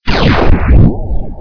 The shockwave following a bomb hitting you.
bang, bomb, boom, explosion, nuclear, nuke, shockwave